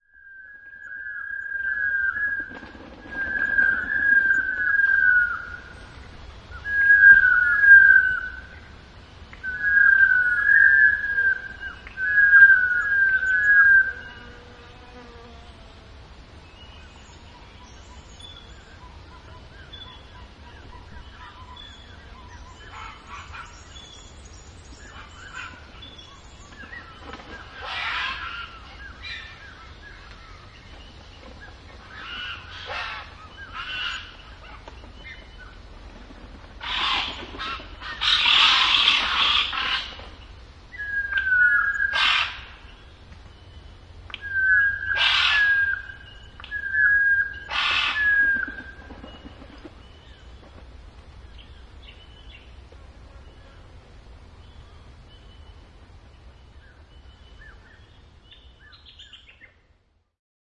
The white-winged chough (Corcorax melanorhamphos)
I just LOVE these birds and their amazing sounds. I often walk in the bush around here and come across groups of them. Despite my many experiences with these beautiful creatures this is the first time that I have actively gone out to capture their sound. I hope this is one of many recordings to come. It can only get better.
Recorded with an MS stereo set up using a sennheiser 416 paired with a MKH 30.
australia, australian-bird, birds, birdsong, chough, field-recording, forest, nature, white-winged-chough